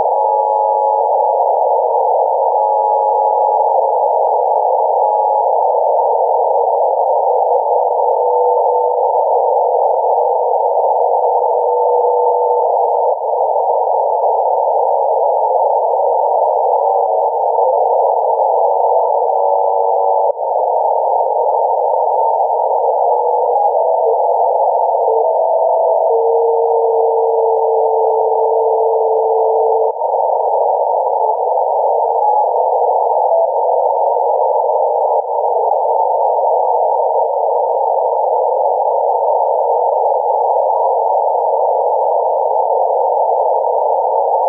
SDR Recording 005
Recorded radio scanning noise.
ambient, electronic, scane, abstract, electric, noise, radio, sci-fi, freaky, digital, space